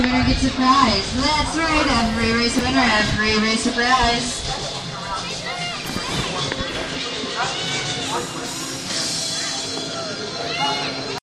wildwood moreyraceawinner
Girl trying to work the crowd on Morey's Pier in Wildwood, NJ recorded with DS-40 and edited in Wavosaur.
ambiance, amusement, field-recording, moreys-pier, nj, park, rides, wildwood